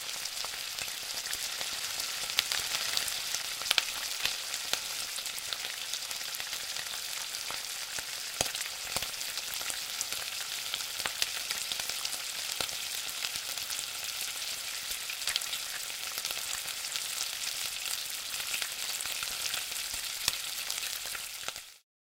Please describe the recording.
Oil cooking on pan FOODCook
Oil cooking in a pan.